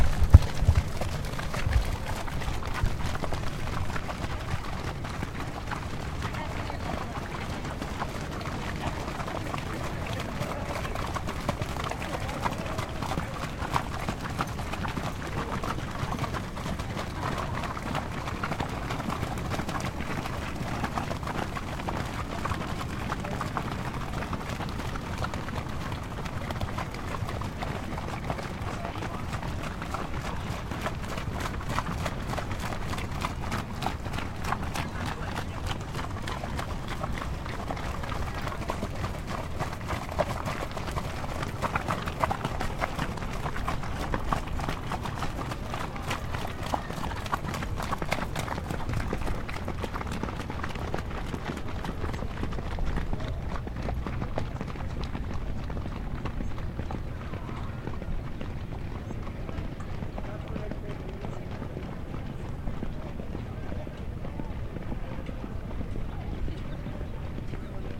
horses to the gate in mud
This is the sound of horses walking by at Arapahoe Park in Colorado. It just finished raining so the track is now listed as sloppy and you can hear how muddy it is. The crowd sounds are relatively quiet.
track, race, crowd, horse-racing